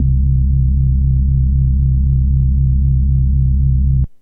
Pulse Bass
a low end wobble to this bass sound created on my Roland Juno-106